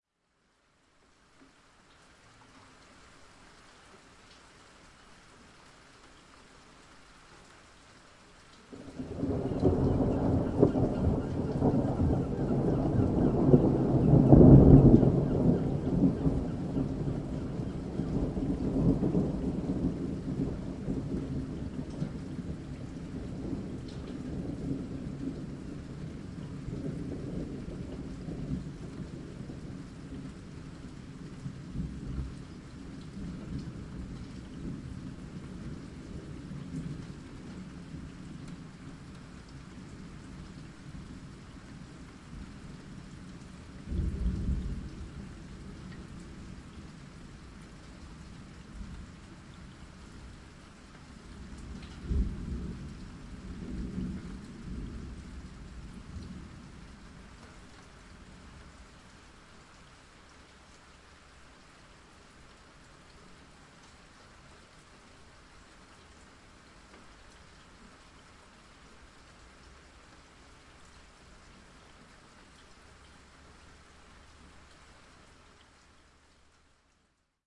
Thunder & Seagull
Recorded with Zoom H2. You can hear a seagull squarking during a roll of thunder.
weather, rain, storm, Thunder